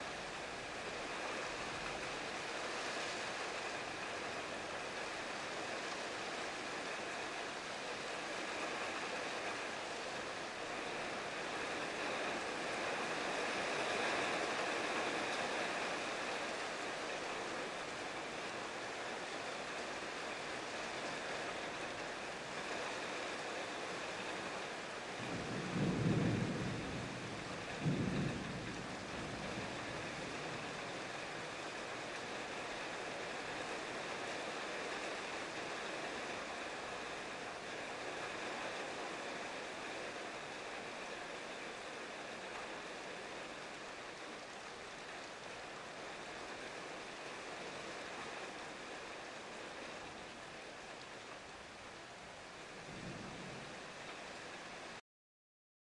rain glassroof thunder
Rain falling onto a glass roof. Small thunder.
Zoom F8, Røde NTG4, Blimp